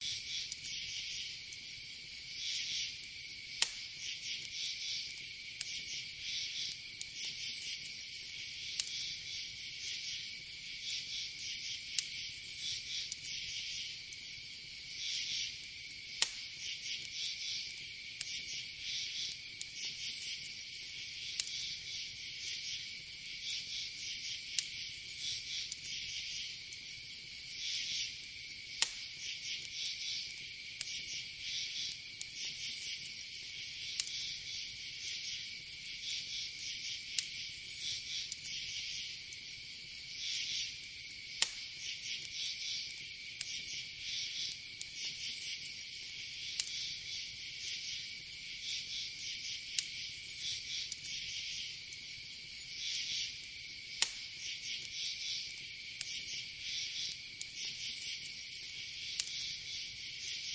Sounds of the forest night
Sounds of the night, campfire & crickets ambience
ambiance, cricket, crickets, field-recording, fire, insects, nature, night, summer